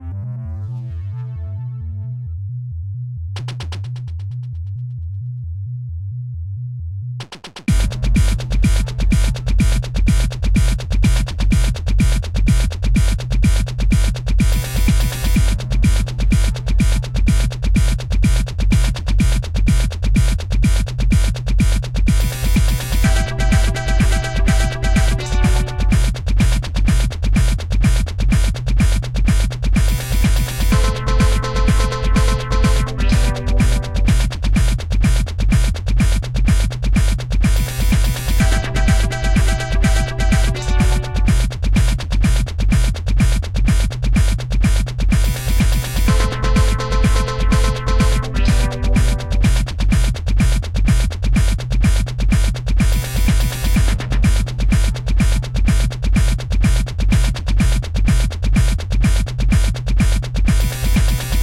This is part of the Electro Experimental. Peace and tranquility (mild or Chilled TECHNO-HOUSE;).
and PSYCLE - recorded and developed October 2016. I hope you enjoy.